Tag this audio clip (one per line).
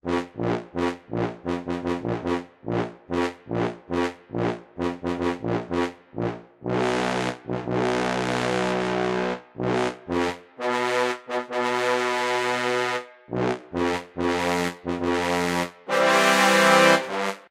adventure
bass
powerful